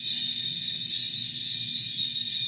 Jingled Bells 11025 Hz. (approx.)
christmas, sleigh, jingle, bells